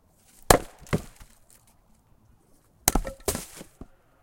Chopping Wood 02
Chopping up some logs of hardwood with a heavy splitting ax.
lumber, ax, chop, cut, split, wood, chopping-wood